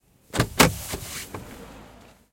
10 Door opening
car, CZ, Czech, door, opening, Panska